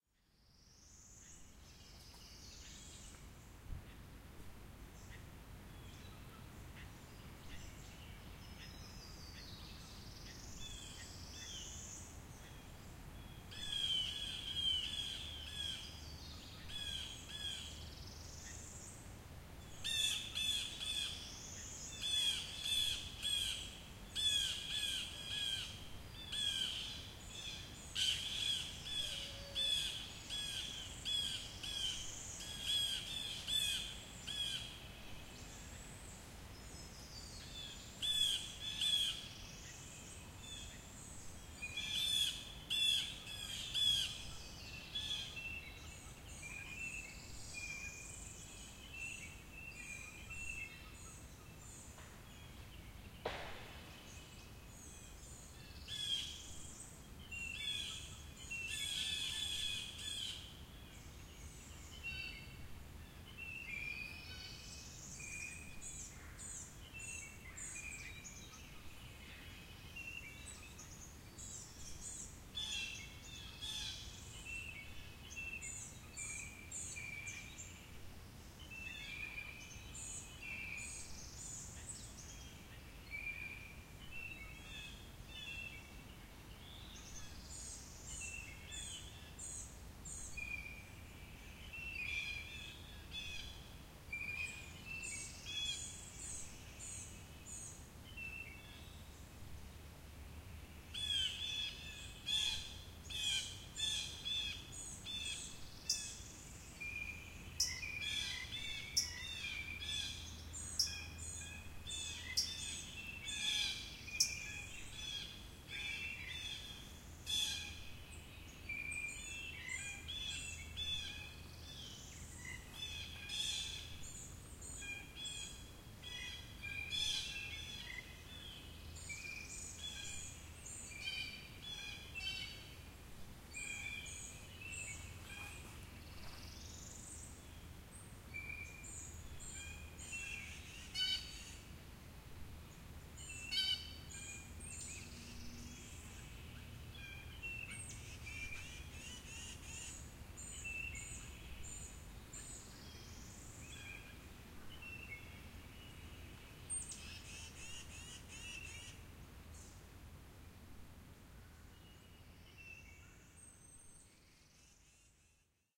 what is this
An interesting recording of birds, led by Blue Jays, doing their alarm calls. I was in the middle of the woods when I noticed that the birds seemed to be alarmed like there was a predator nearby and sure enough, I finally spotted a big Barred Owl sitting high in a tree about 50 yards from my recorder. Pretty amazing how the bird community sounds the alarm.
tranquil, blue-jays, bird-calls, woods, forest, serene, field-recording, spring, peaceful, ambience, bird-songs, Birds, alarm